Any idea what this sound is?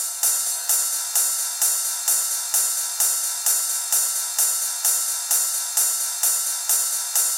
909 Ride Loop 130BPM
Sample from my latest free sample pack. Contains over 420 techno samples. Usefull for any style of electronic music: House, EDM, Techno, Trance, Electro...
YOU CAN: Use this sound or your music, videos or anywhere you want without crediting me and monetize your work.
YOU CAN'T: Sell them in any way shape or form.